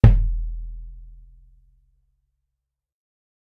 Bass Drum Hit 1
A bass drum hit with a mallet. Recorded with an AT2020 through a fast track. Edited in Ableton.
kick, percussion